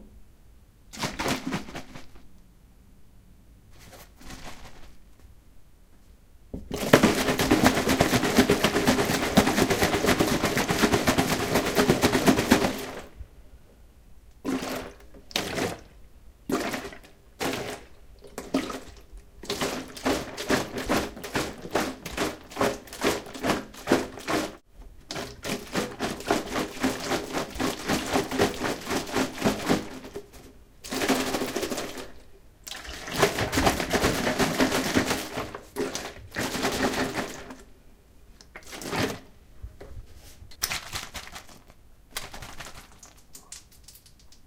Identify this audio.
Water Bottle Shake
Shaking different water bottles, one has lots of soap and water in it, another has alcohol, another has a bit of water and a little soap...
Recorded with Zoom H2. Edited with Audacity.
alcohol, bar, bartender, bottle, cocktail, drink, liquid, mix, mixer, mixing, plastic, shake, shaking, show-off, stir, water